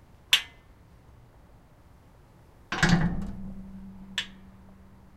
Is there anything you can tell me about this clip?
A metal gate latch opening then the gate closing afterwards.